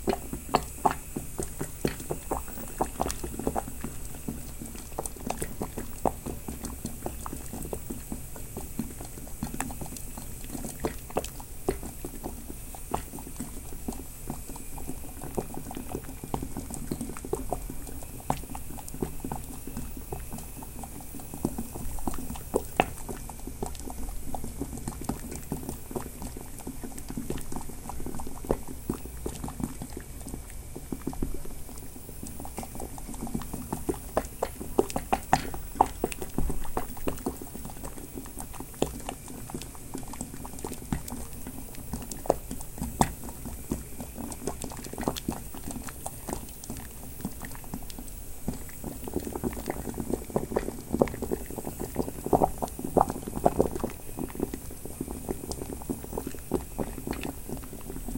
Boil-in-bag in the boiling (obviously :) water, and the gas stove hissing.
Recorded by Sony Xperia C5305.